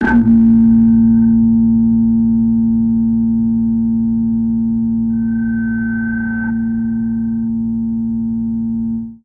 DJB 74 larsen
Some Djembe samples distorted
dark, noise, sfx, drone, distortion